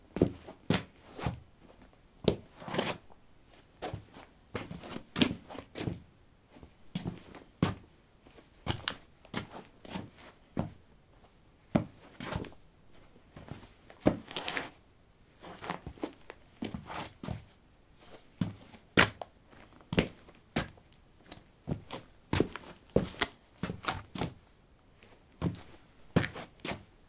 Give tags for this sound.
footstep
footsteps
step
steps

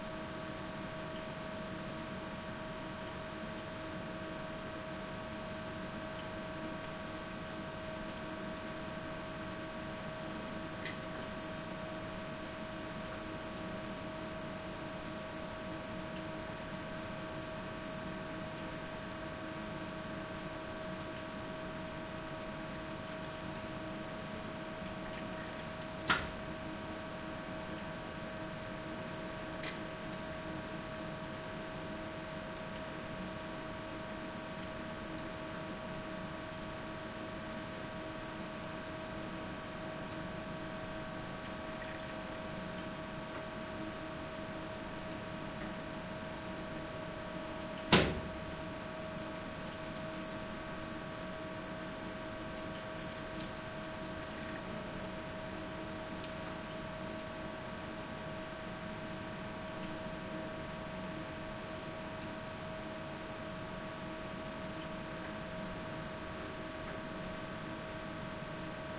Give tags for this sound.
amb
Lofoten
system
machines
industrial
room
mechanical
ventilation
ambience
machinery
Norway
boiling
noise
Norge
basement
tubes
g
indoors
furnace-room
field-recording
furnace
machine
Kabelv

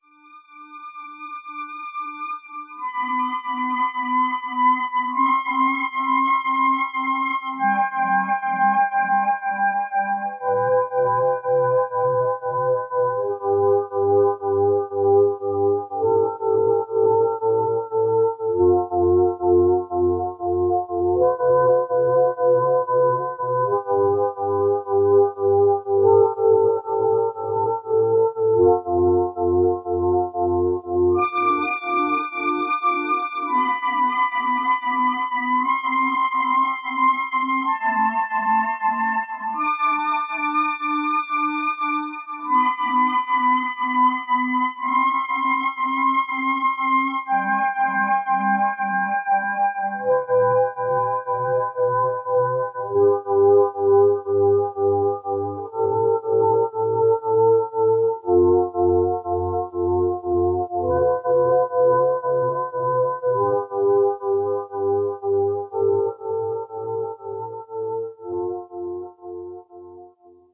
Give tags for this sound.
Mood
Scifi
Ambient

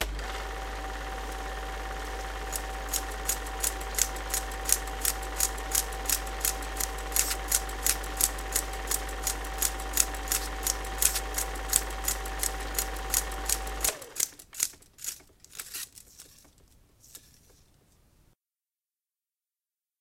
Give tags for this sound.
8mm
film
flopping

